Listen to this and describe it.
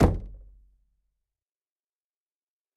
Door Knock - 26
Knocking, tapping, and hitting closed wooden door. Recorded on Zoom ZH1, denoised with iZotope RX.
percussive, wooden, tap, hit, wood, percussion, door, closed, bang, knock